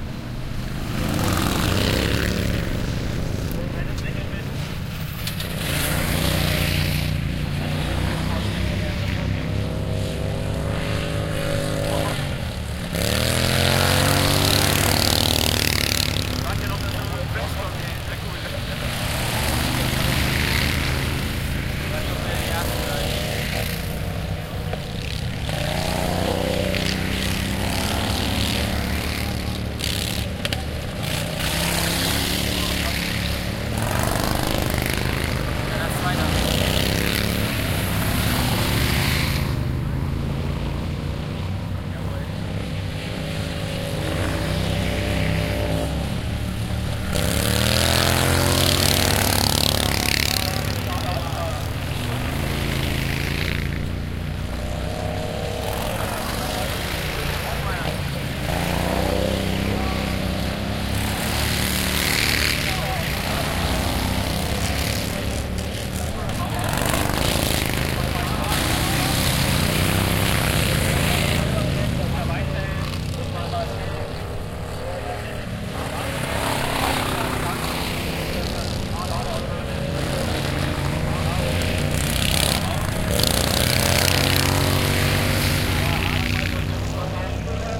This was just a quick recording
of a lawn mower racing.
i liked the buzzing sound and caught
it with
lawn-mower, machine, machines, motor, racing, wheels